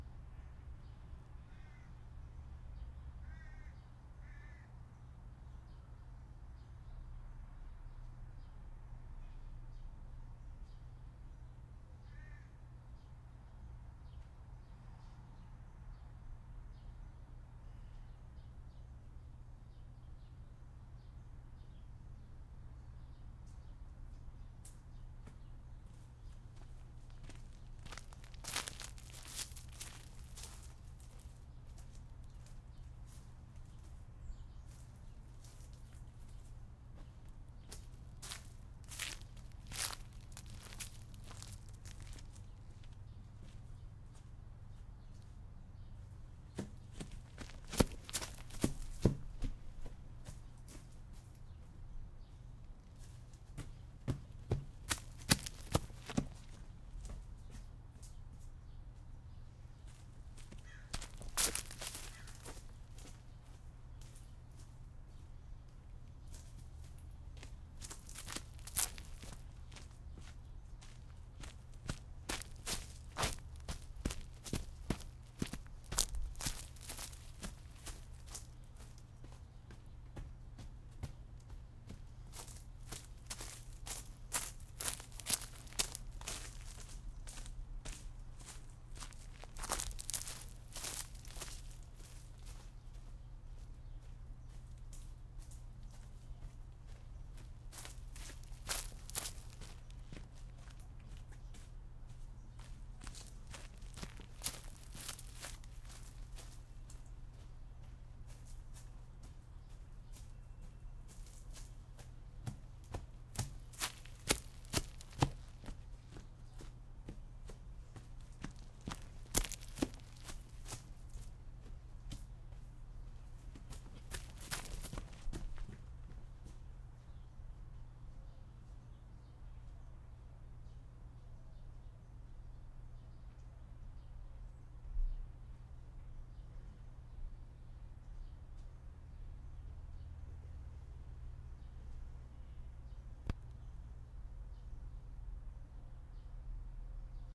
walking in a barrel of leaves wearing sneakers